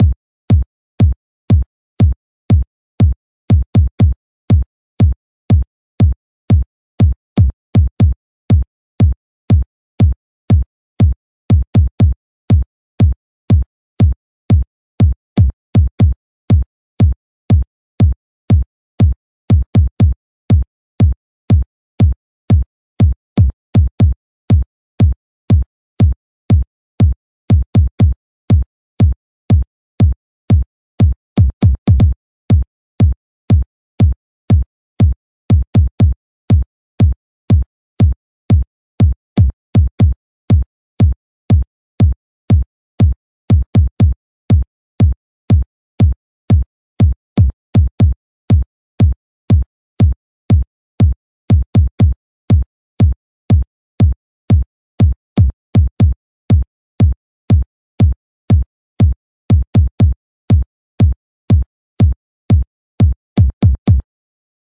A sample created with Logic Pro X and its in stock plugins
bass, DRUM, edm, KICK